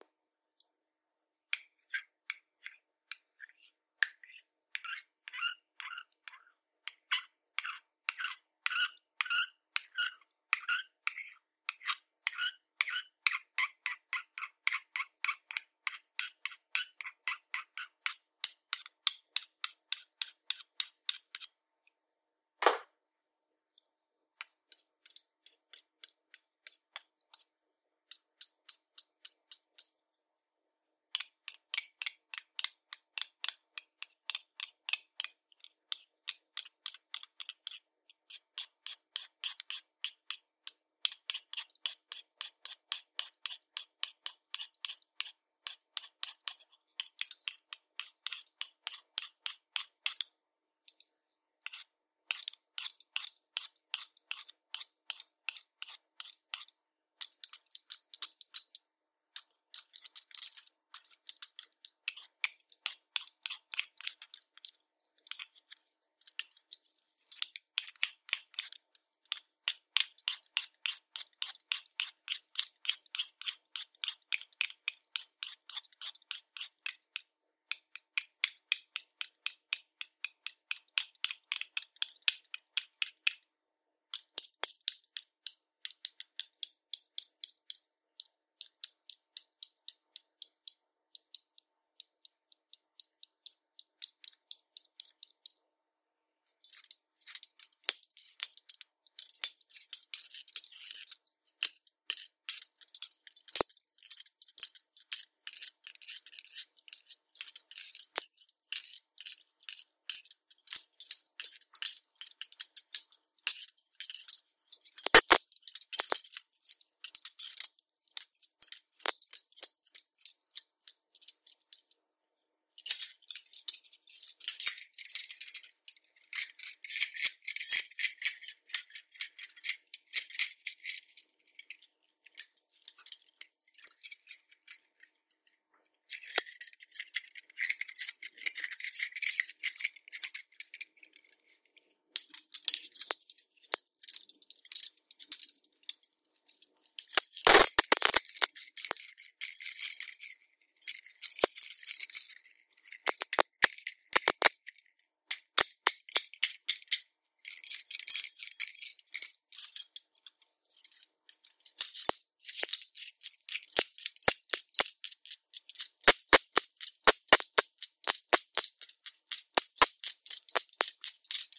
kitchen utensils, hitting measuring spoon ring
Recorded specially for use as Chain Mail movements and other such jingling.
jingling, metallic